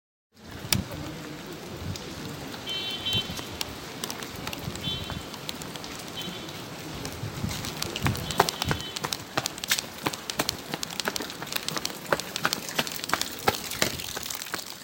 Rain, car, steps
rainy day, cars moving and steps